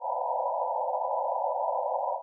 Random Sound created with SuperCollider. Reminds me of sounds in ComputerGames or SciFi-Films, opening doors, beaming something...
ambience, ambient, atmosphere, electronic, horn, sci-fi, sound, supercollider